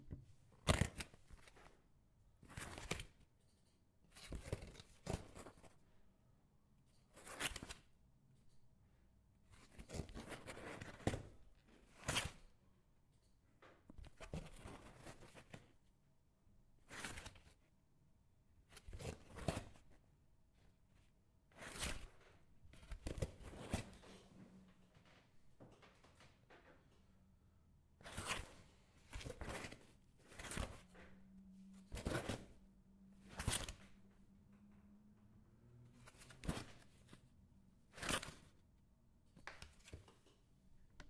open box
Open and close a small cardboard box